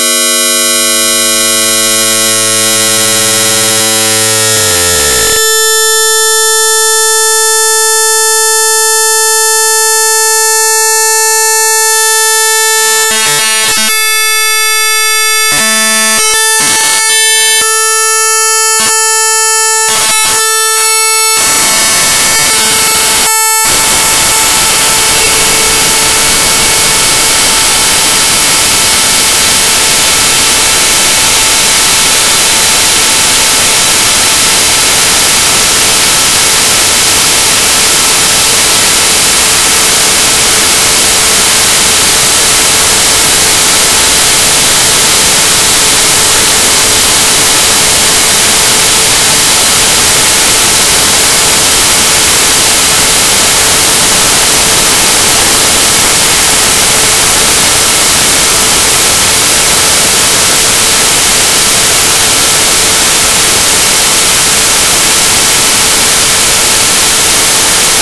chaotic resonances that soon falls into more and more chaotic behavior.
quite a smooth chaotic noise sound.
made from 2 sine oscillator frequency modulating each other and some variable controls.
programmed in ChucK programming language.
sine, programming, sci-fi, chuck, chaos